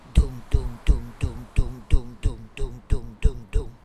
LEE RdR XX TI01 doepdoepdoep
Sound collected in Leeuwarden as part of the Genetic Choir's Loop-Copy-Mutate project.